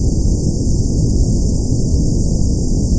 factory noise synthesized
Created with Audacity from white noise and various filters. Sounds like compressed air being released, combined with a low rumble.
hiss, air, industrial, rumble